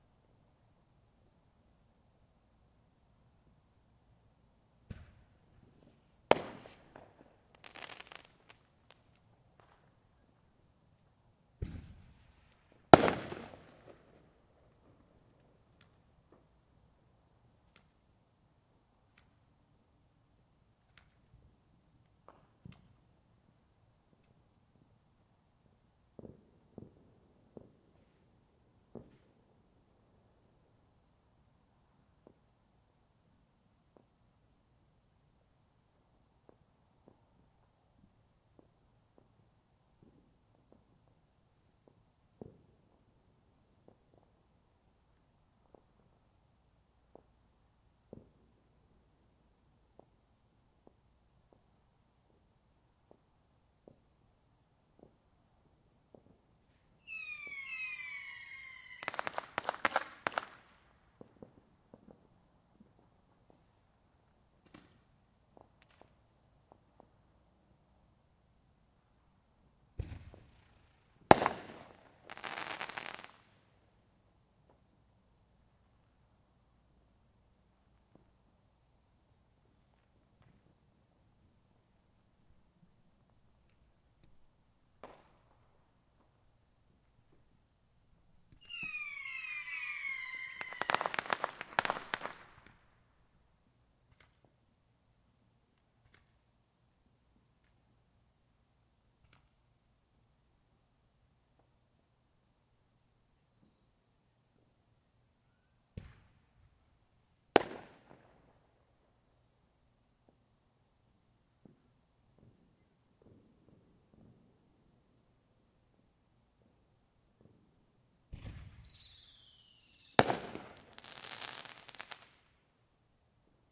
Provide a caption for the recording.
Fireworks recorded with laptop and USB microphone and what sounds like two guns in the background. It sounds like a 9mm and either a 357 or someone lighting off a quarter stick.
4th, gun, july